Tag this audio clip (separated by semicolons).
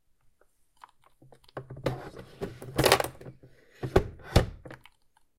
appliance; boil; boiling; brew; coffee; coffee-maker; cup; espresso; hot; kettle; machine; maker; steam; tea; time; water